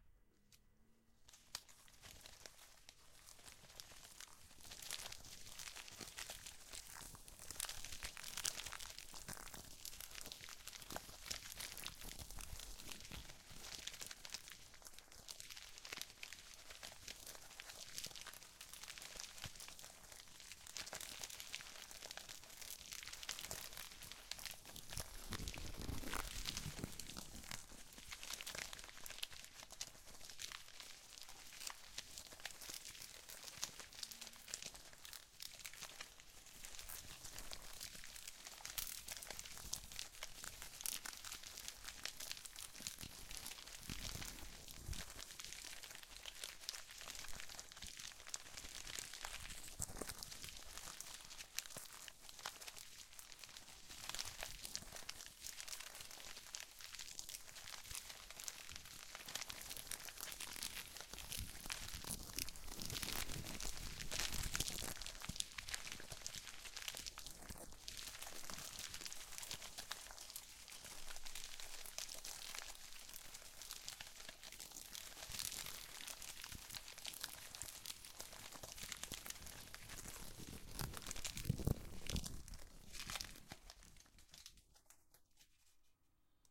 Spider Horde (1 min) STEREO 01
A sound created to suggest a horde of spiders crawling over the ground.
If you desire to use it, please include a credit to 'The Black Dog Chronicles' and provide the link, here:
thank you to everyone who contributes to this community.
creeping, creepy, horde, horror, insect, scary, spider